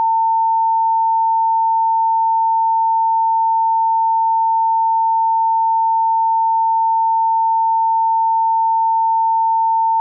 900hz sine wave sound
900hz, sound